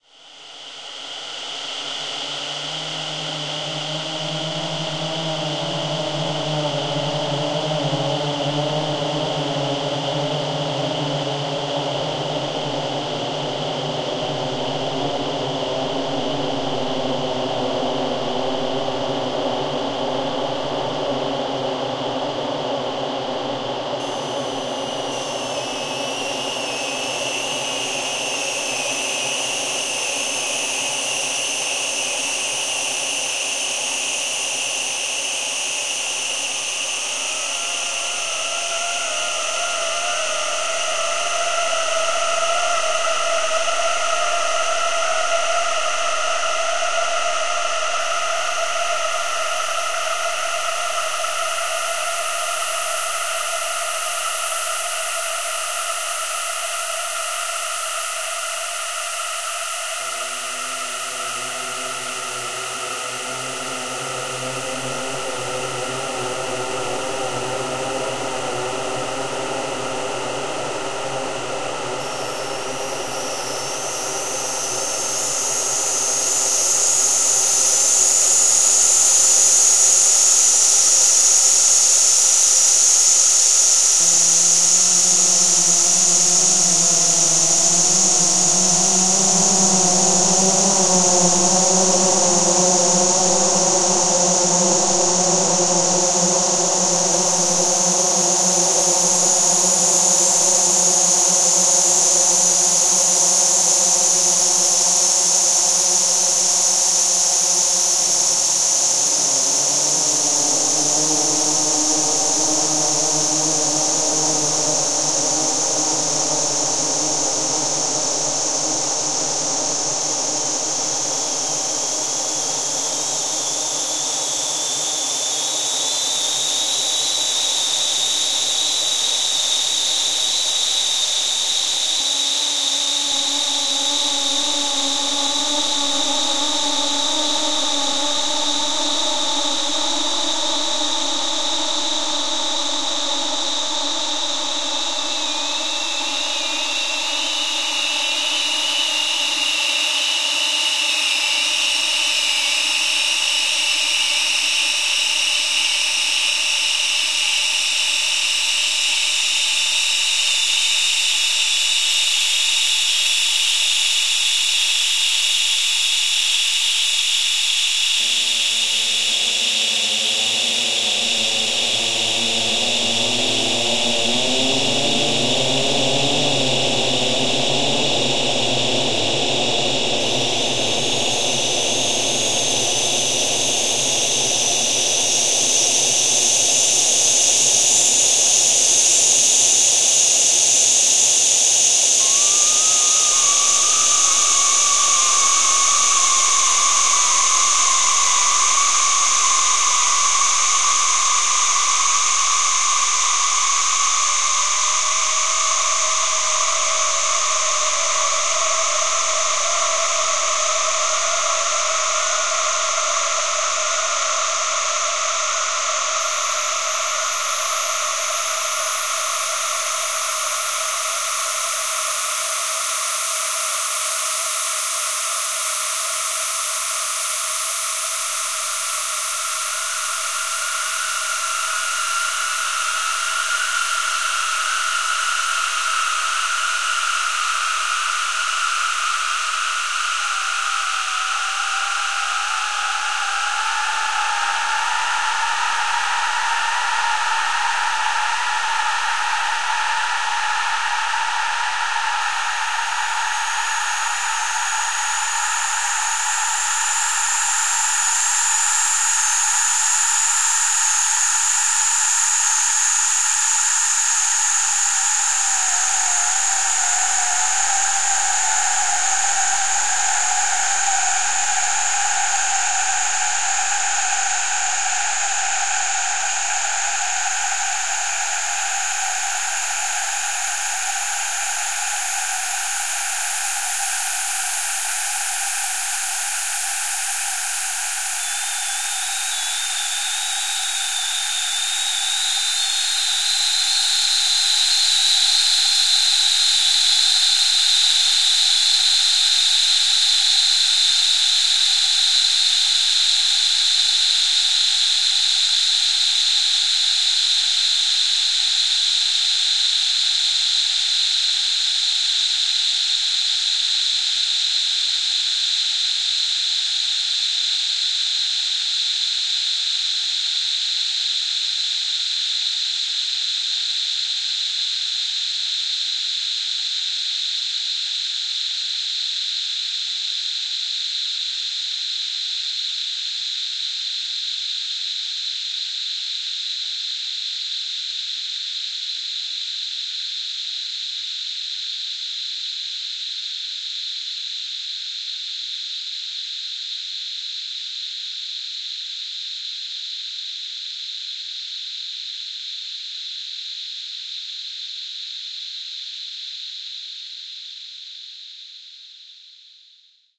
This sample is part of the "Space Drone 2" sample pack. 5 minutes of pure ambient space drone. A darker variation on the same theme as the other samples in this pack.